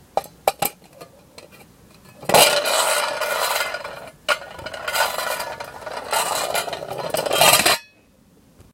Rolling Can 02
Sounds made by rolling cans of various sizes and types along a concrete surface.
aluminium, can, roll, rolling, steel, tin, tin-can